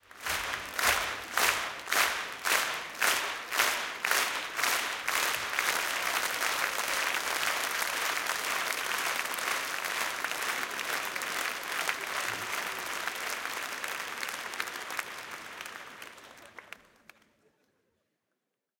crowd applause theatre
theatre, crowd, applause